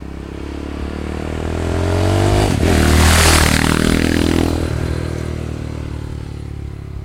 Motorcycle passing by (Yamaha MT-03) 7
engine, field-recording, moto, motor, motorcycle, stereo, tascam, yamaha-mt03